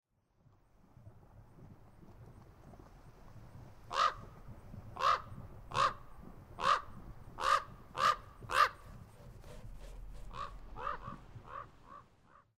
Common Raven - Yellowstone National Park
a recording from the sound library of Yellowstone national park provided by the National Park Service
nature, raven, call, common-raven, bird, field-recording